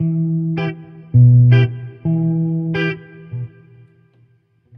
electric guitar certainly not the best sample, by can save your life.
guitar, electric